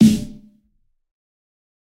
This is a realistic snare I've made mixing various sounds. This time it sounds fatter